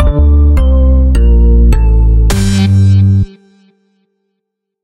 Groove Hit #1
Just a groove/funky sound.
2019.
american, bass, beat, dance, funk, funky, ghetto, groove, groovy, hip-hop, intro, sfx, soul, swing